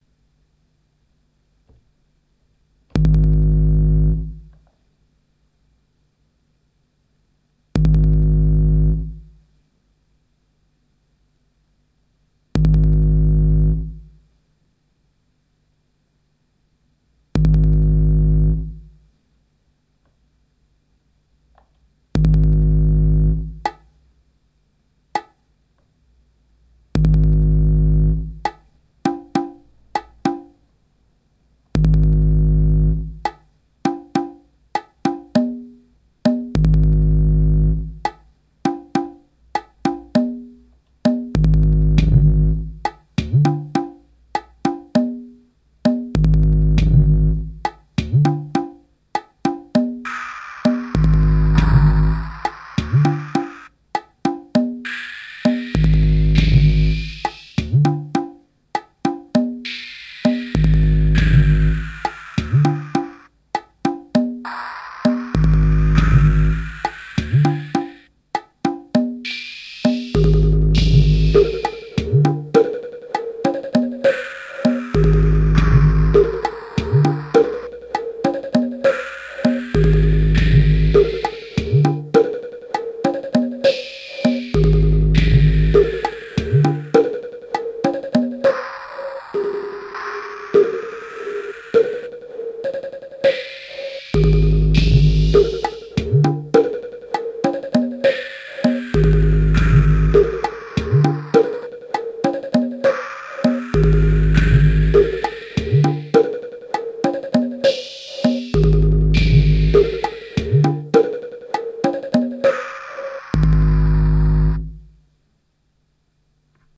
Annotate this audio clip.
Electribe#4LRTT
improvised piece on Electribe SX1
drumcomputer, electribe, impro